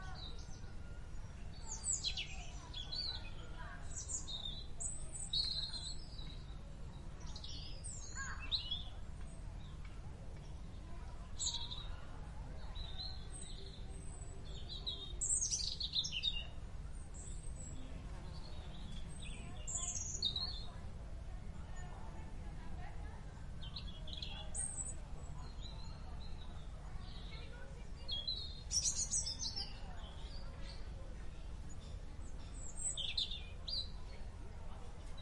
Birdsong Furzey Gardens
Ambience at Furzey Gardens, New Forest, Hampshire, UK in the summer. There are families eating, drinking and chatting at a nearby cafe whilst the birds sing in the gardens. Recorded on a Zoom H5 on a tripod using the internal capsules and a dead-cat.
gardens, hampshire, summer, children, uk, people, playing, field-recording, cafe, birdsong, park, voices, ambience, bird, nature, furzey, insects, distance